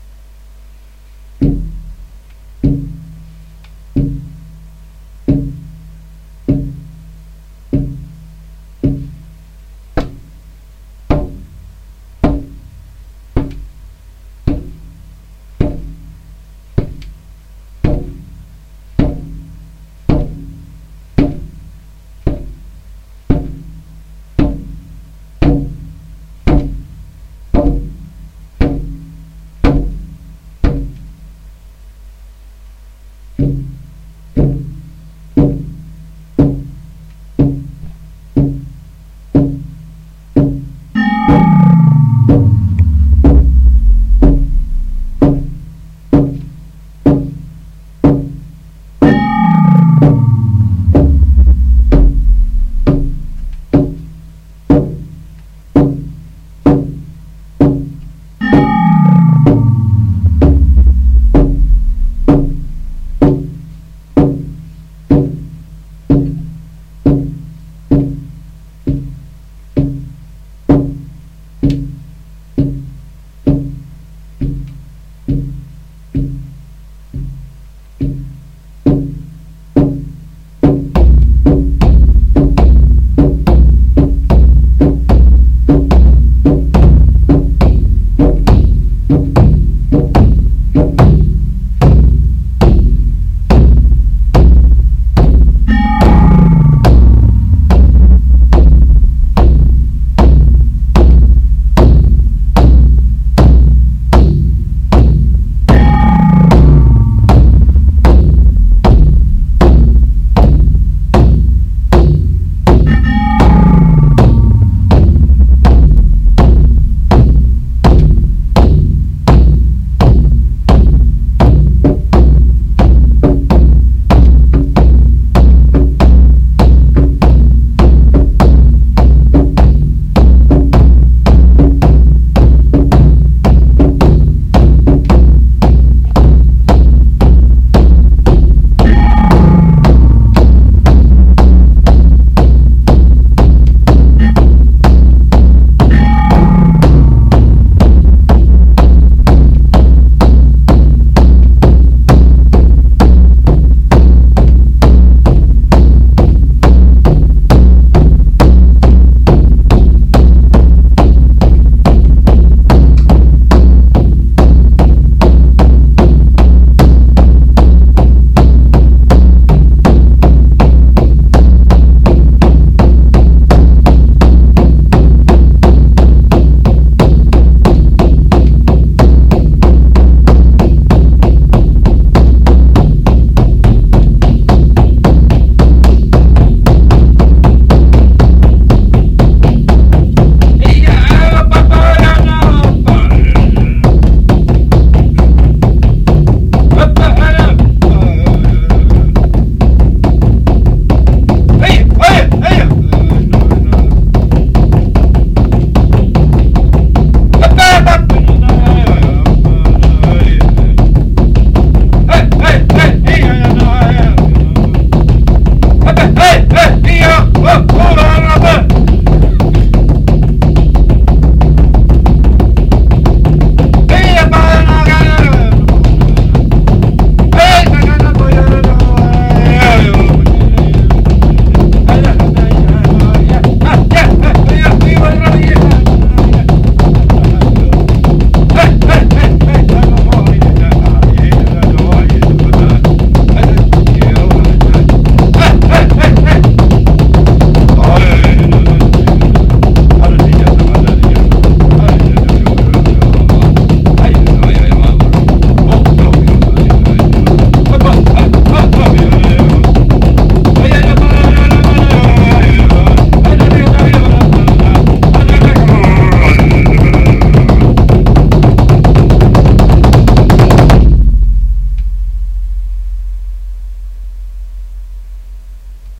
We tried to make the sound like a field recording with simple recorder from the early days of recorders and synths. So, it is meant to sound brutal. Perfection is boring to me.